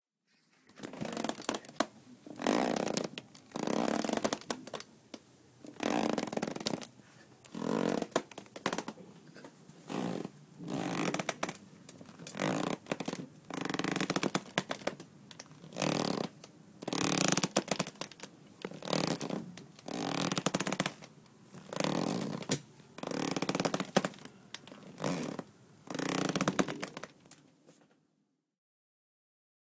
Rope Cracking
A sound I created by moving a chair that closely resembles the cracking of a rope in tension.